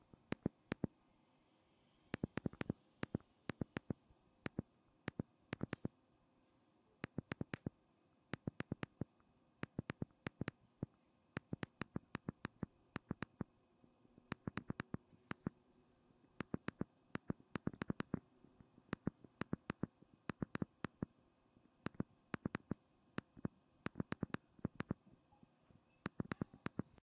phone keypad
Pushing buttons on an old mobile phone, no sound other than the buttons clicking. Recorded with an AT4021 mic into an Apogee Duet.